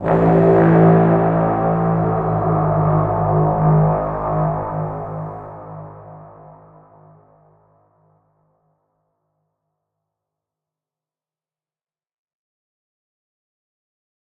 War of the worlds Horn 1
Had a go at making some of the Tripod sounds from war of the worlds , Turned out orite, will be uploading a tutorial soon.
dark, electronic, scary, worlds, zero